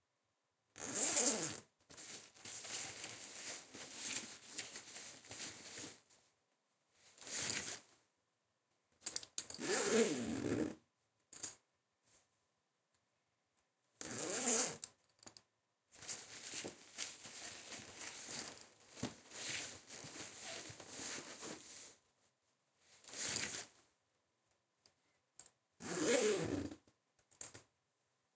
Backpack unzip search pulloutBooks
Unzip backpack, search, pull out books, then zip it up